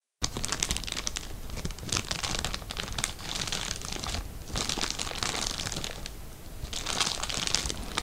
the rustle of cellophane packaging on a bag of candy. Opening a bag of candy.